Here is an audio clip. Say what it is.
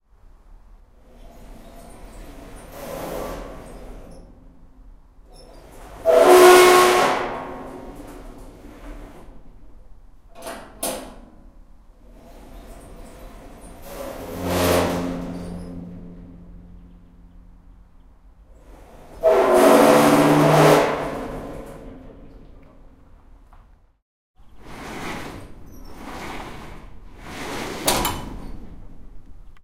Squeaky metal bin recorded outside our hostel in Metz.
Recorded with Tascam DR05.